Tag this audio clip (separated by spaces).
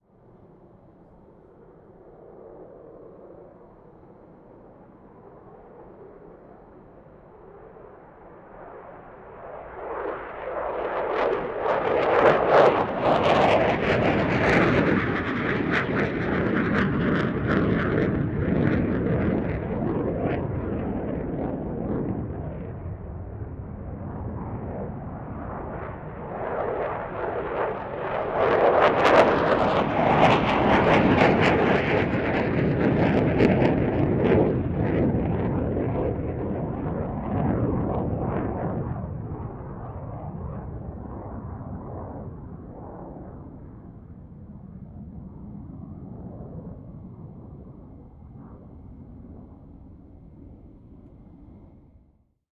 aircraft aviation F16 fighter jet military plane